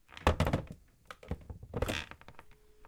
digital telephone
Phone clambering. Sound recorded in a small studio room.